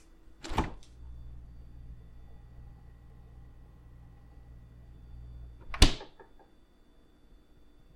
fridge door close 01
closing a fridge door